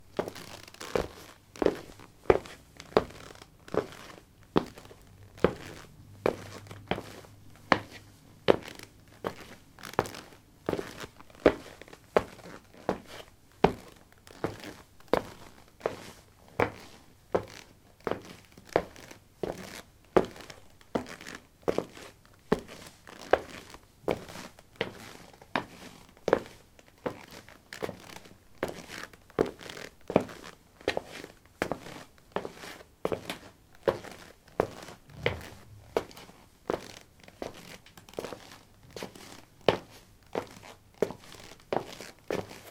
lino 18a trekkingboots walk
Walking on linoleum: trekking boots. Recorded with a ZOOM H2 in a basement of a house, normalized with Audacity.
walking, footsteps, footstep, step, steps, walk